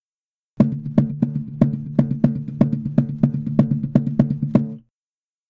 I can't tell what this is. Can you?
Jungle Guitar Drum
Try as a loop! I used my acoustic guitar and did some knuckle-knocking on the body while muting strings. Sounds like a native drum! Effect: speed increase from 33 1/3 rpm to 45 rpm, moise reduction and vol. envelope. Recorded on Conexant Smart Audio with AT2020 mic, processed on Audacity.
acoustic, beat, drum, drumming, foreign, guitar, hit, jungle, knocking, knuckle, loop, rhythm, tap, tapping, tribal, war-drum